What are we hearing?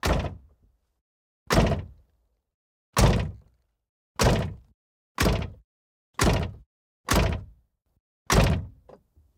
Volkswagen Golf II 1.6 Diesel Foley Door Damaged Close Mono
This sound effect was recorded with high quality sound equipment and comes from a sound library called Volkswagen Golf II 1.6 Diesel which is pack of 84 high quality audio files with a total length of 152 minutes. In this library you'll find various engine sounds recorded onboard and from exterior perspectives, along with foley and other sound effects.
vehicle, foley, diesel, close, golf, door